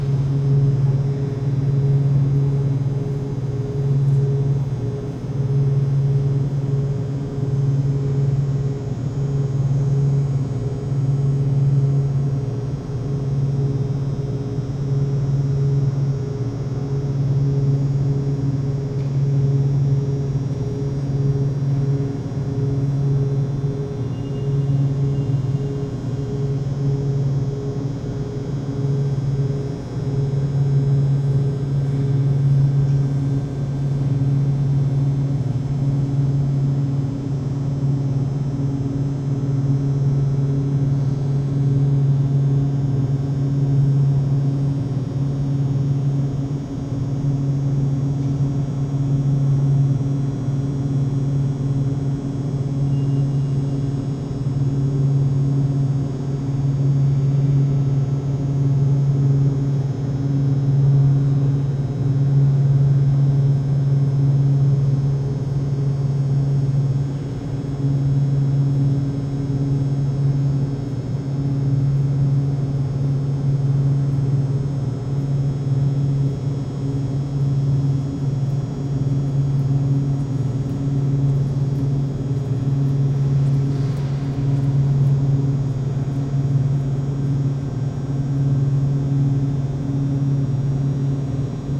metro subway tunnel heavy buzz and hum tonal Montreal, Canada
buzz, Canada, heavy, hum, metro, Montreal, subway, tonal, tunnel